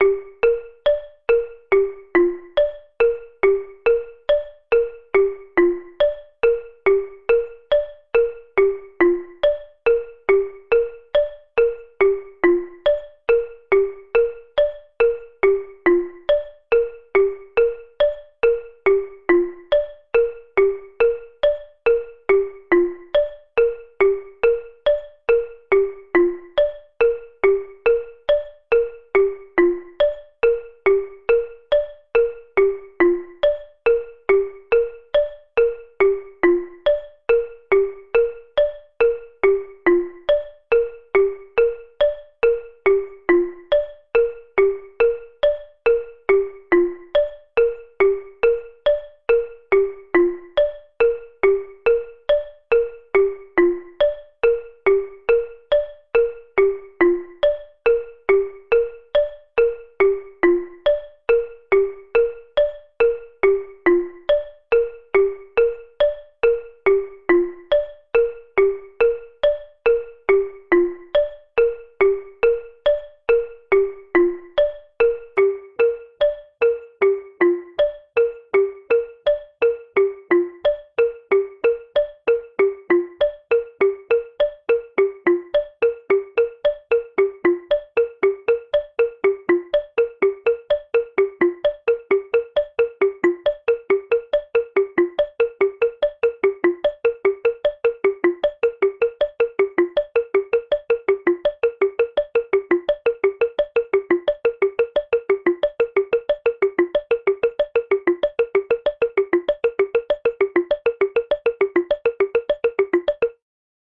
Xylophone clock sound, tune gets faster towards end as time runs out.
watch, time, answer, quiz, tick, tick-tock, questions, alarm, clock, xylophone, ticking, tock, limit